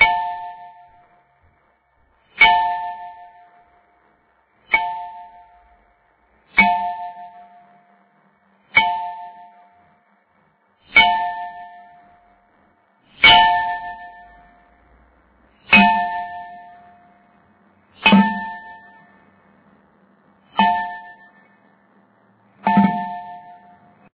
Glass Vase (Accoustic)
Hit a Glass Vase with my Finger(s), mostly Ankle has some nice Accoustic.
-Variating Hits
glass, hit, vase